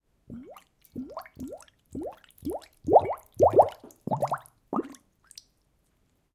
Water bubbles created with a glass.